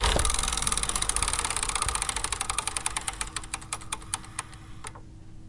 Bicycle spokes
Spoke sound of a rusty old bike
bicycle, bike, cycle, gears, mechanic, pedaling, spokes, wheel